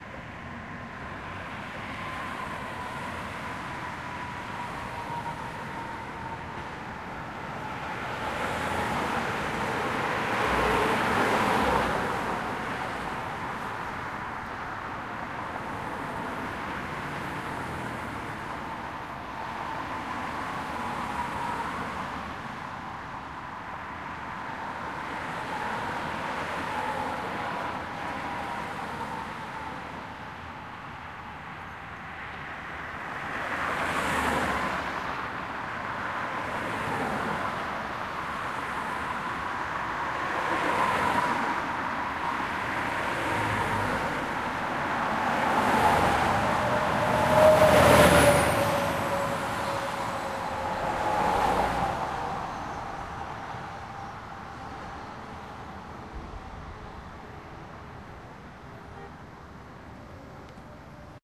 Cars passing in both directions. Recorded on Columbus Drive near Grant Park in Chicago. Equipment: Zoom H4N, 80Hz low cut and general limiter enabled.
ambient, automobiles, background